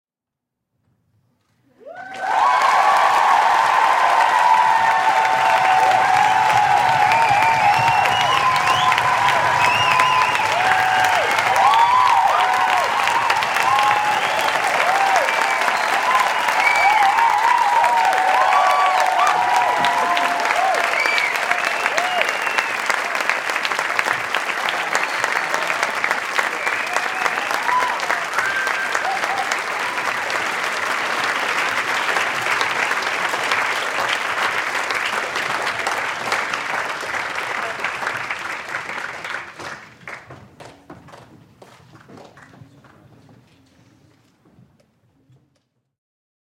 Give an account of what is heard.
Applause, huge, thunderous
An amalgamation of several other applause tracks to give the impression of a much bigger audience going crazy with cheering and some foot stamping.
Recorded on Marantz PMD661 with Rode NTG-2
applause, audience, enthusiastic, huge, people, thunderous